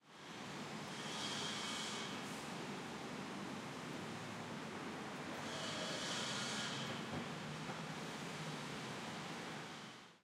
Chantier-Amb+meuleuse(st)
A general ambiance in building site recorded on DAT (Tascam DAP-1) with a Rode NT4 by G de Courtivron.
site, field, building, recording, work